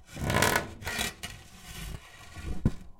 Wood Scraping Close 1
Scraping a plank. Recorded in Stereo (XY) with Rode NT4 in Zoom H4.
block, file, filing, grind, grinding, plank, scrape, scraping, scratch, scratching, squeaking, squeaky, wood, wooden